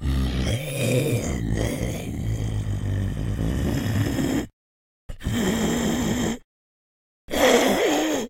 Monster growl 15
A monster/zombie sound, yay! I guess my neighbors are concerned about a zombie invasion now (I recorded my monster sounds in my closet).
Recorded with a RØDE NT-2A.
Apocalypse, Creature, Dead, Growl, Horror, Invasion, Monster, Monsters, Scary, Scream, Zombie